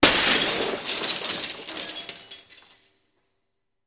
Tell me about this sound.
Windows being broken with vaitous objects. Also includes scratching.
break, breaking-glass, indoor